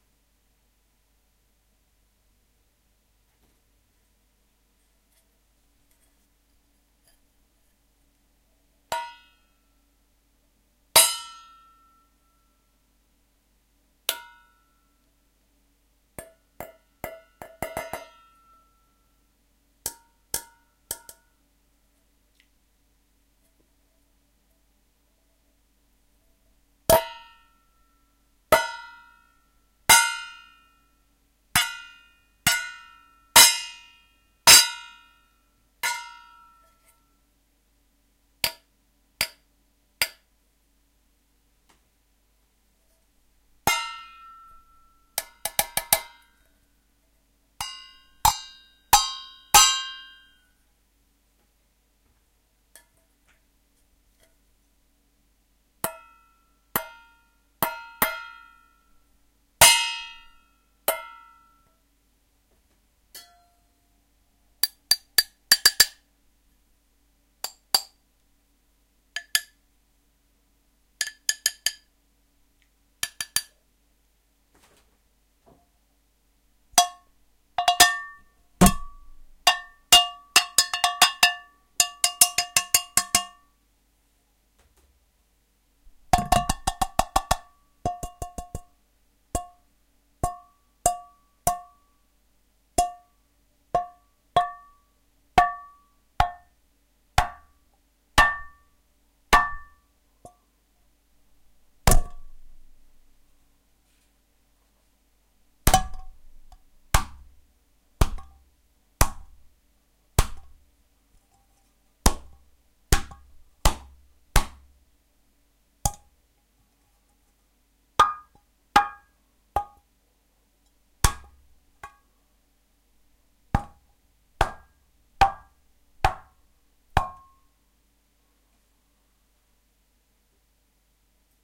MR Pan and Pots
Several hits on pans and pots with spoons, fingers, hand, head and on each other.
Nice for percussions.
kitchen, pan, pot, spoon